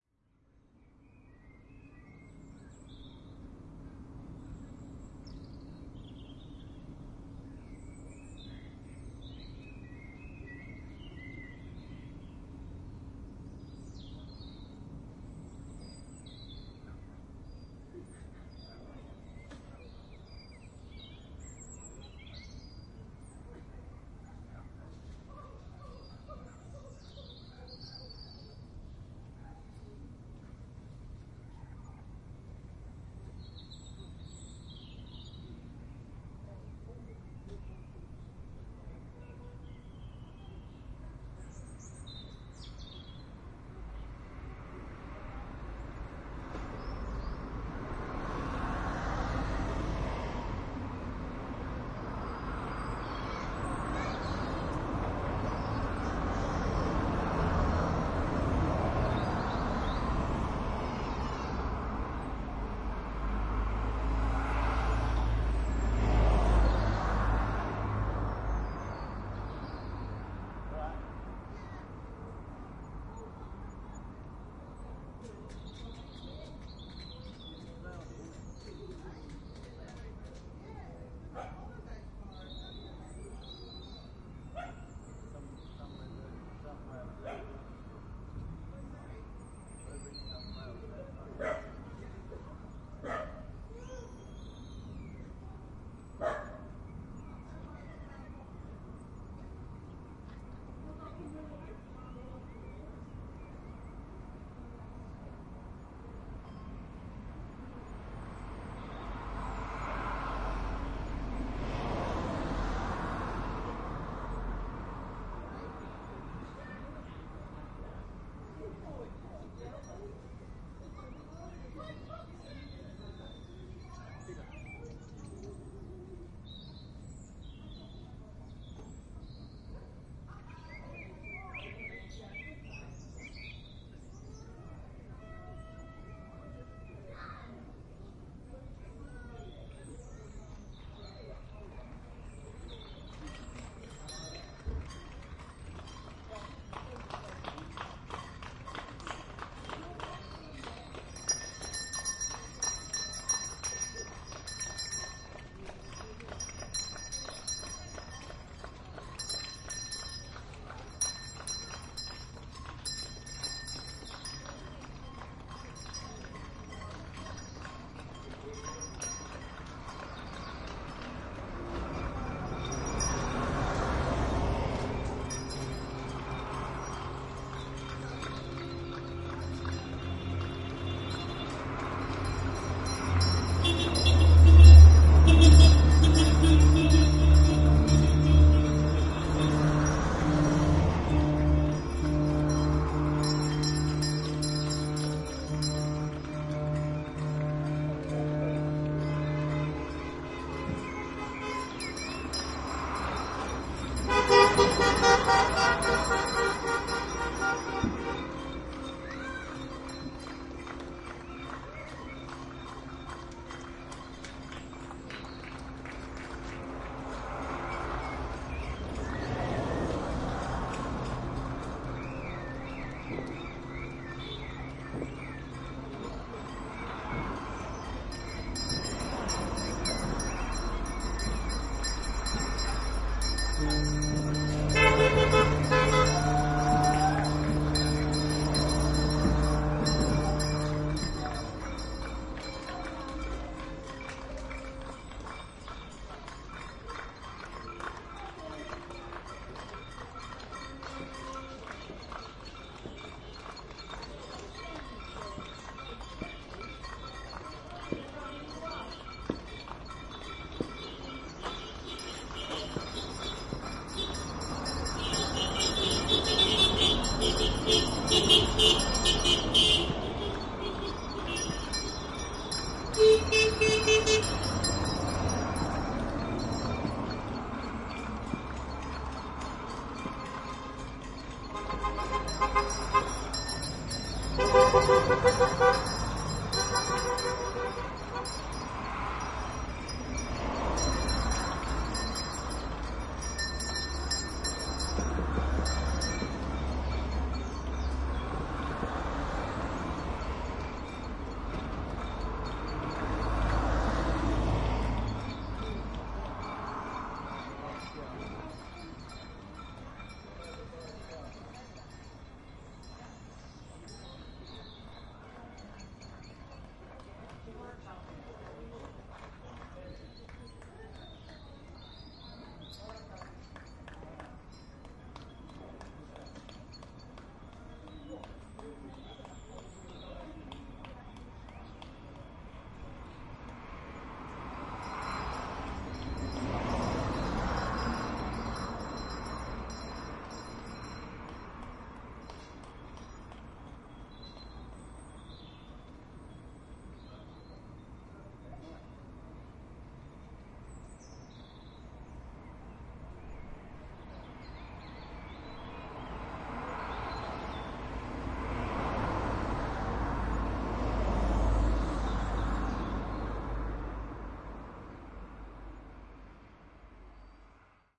Clap for carers, 8pm 21st May 2020, Southampton, UK
People clapping, cheering, ringing bells, fireworks, car horns, ships foghorn in support of the NHS and UK care workers during the covid-19 lockdown.
Zoom H1, Luhd mics, deadcats